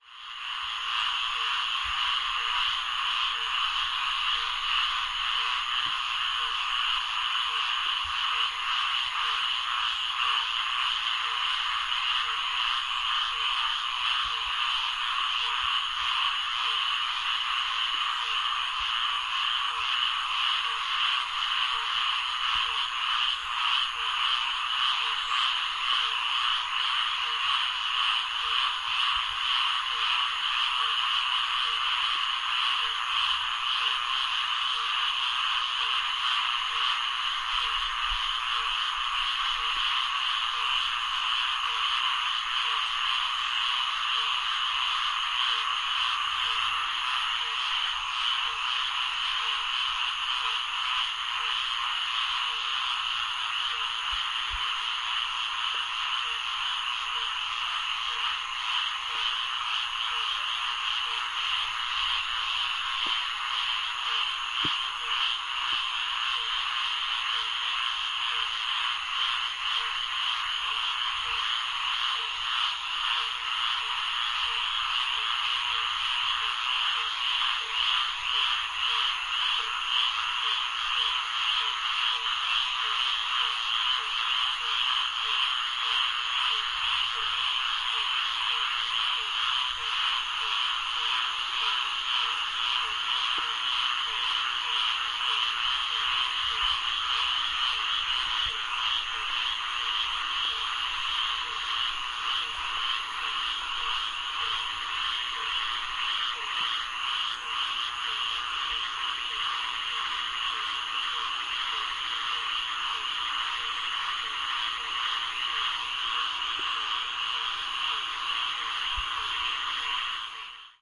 Ambience, Florida Frogs Gathering, A
Two minutes of a large gathering of frogs nearby Callahan, Florida, just after a rain storm. I suspect it could be a mating gathering, but they could also be plotting about how to take out that strange fellow that is recording them.
An example of how you might credit is by putting this in the description/credits:
The sound was recorded using a "H1 Zoom recorder" on 2nd August 2017.
mating, florida, mate, frog, call